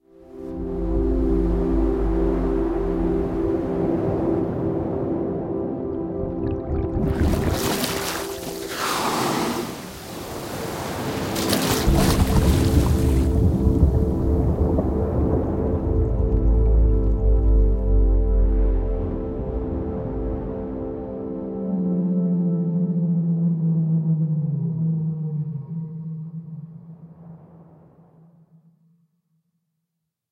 Thar'she blows
a whale comes up for a breath of air and dips back into the ocean
ocean, underwater, whale